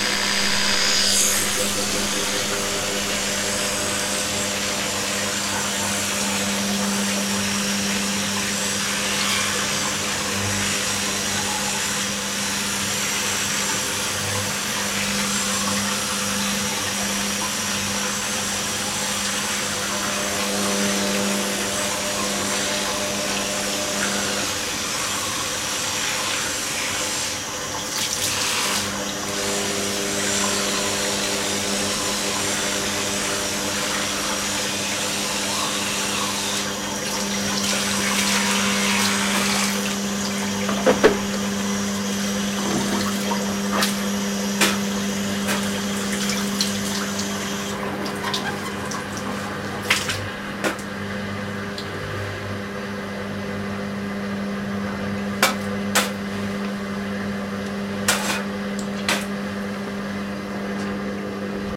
Bathroom Set Noise 2
Random bathroom set noise. Recorded with Edirol R-1 & Sennheiser ME66.
bath, bathroom, brushing, brushing-teeth, electric, electrical, electrical-toothbrush, laundry, machine, mechanical, room, set-noise, spit, spit-out, spit-out-water, spitting, tap, teeth, towel, washing, water